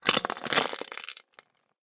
A somewhat long crunch. Like "HuechCrunch" it has a springy repetitive aspect to the crackles, as if portions of the egg-shell are rocking as they crack. Maybe they were. See the pack description for general background.
bug, crack, crackle, crunch, crush, eggshell, egg-shells, grit, quash, smash, smush, squash, squish